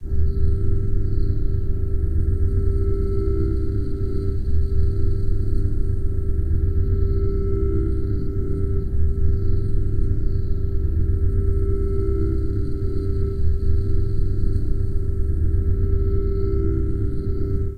Space Ambience Phasey Outer Spacey Galaxy Humming Hum Ambience Warm Ambient Background BG FX 2 - Nova Sound
7, 8, Outer, 7-bit, Drill, Alien, bit, Universal, Cyber, Spacey, Extraterrestrial, Bugs, 8-bit, Animal, Sound, Grind, Robot, Nova, Satelite, Space, Satellite, Digita, ET, NovaSound, Machine